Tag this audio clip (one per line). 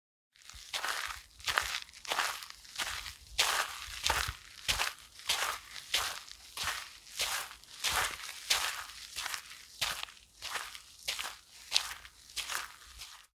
steps
walk
footsteps
walking
step
sand
gravel